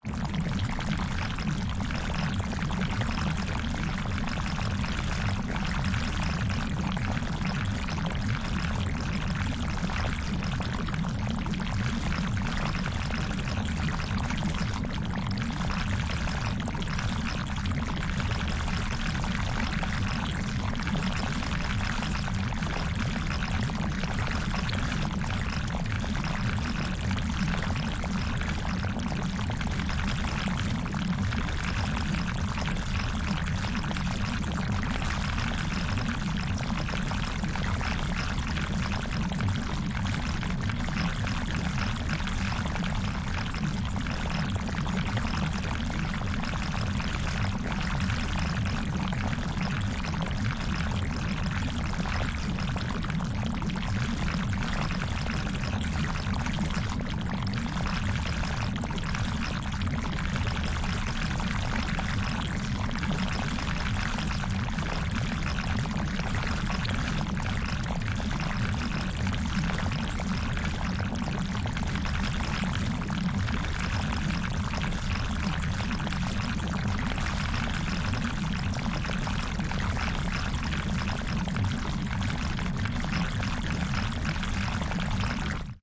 Liquid, Mud, Organic, viscous,Squishy, gloopy.
Channel: Stereo